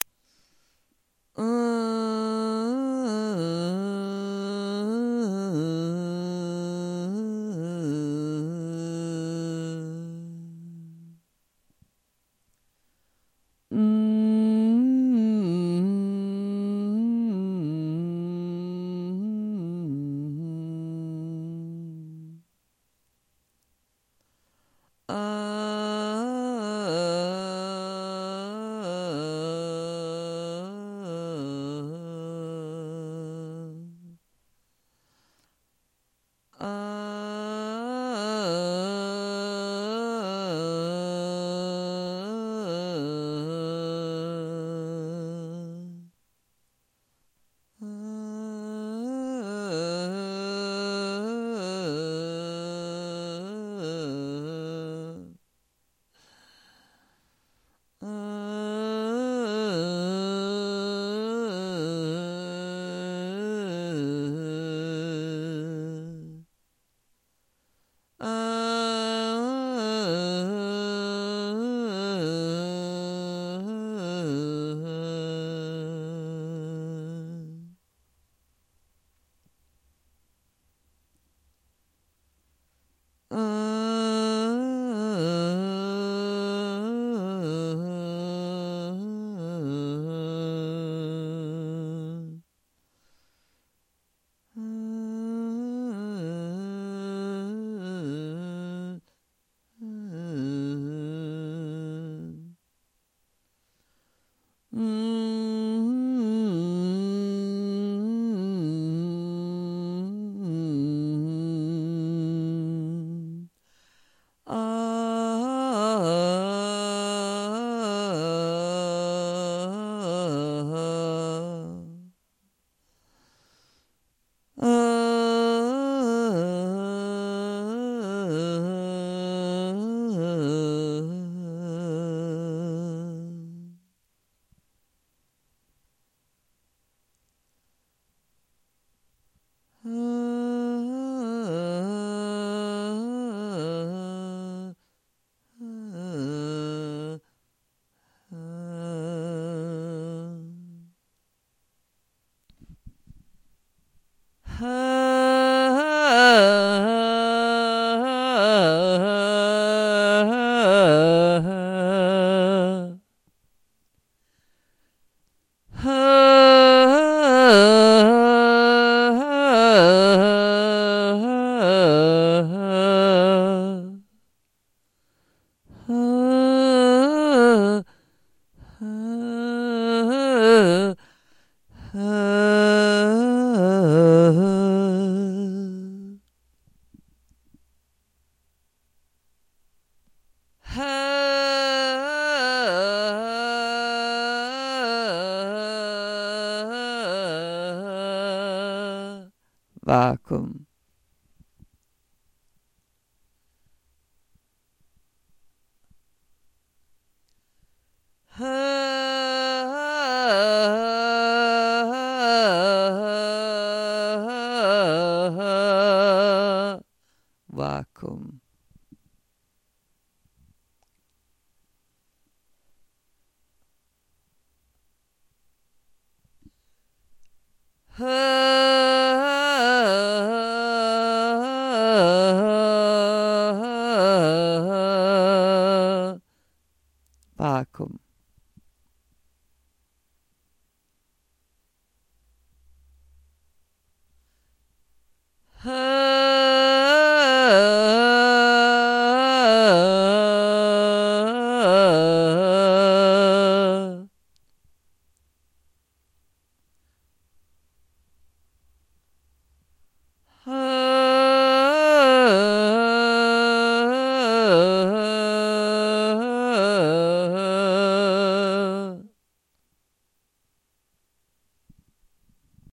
Open and close dry
atist, free, frre, help, idee, song, vocals